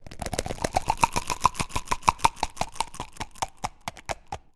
Bottle Vowels
Made by shaking a pill bottle and opening and closing the top to modulate the "hallow" sound.
bottle, hallow, plastic, shaker